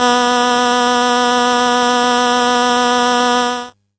Trumpet C2 VIB
These are the "Instrument" sounding sounds from a broken keyboard. The
name of the file itself explains spot on what is expected.
lofi, hifi, sample, homekeyboard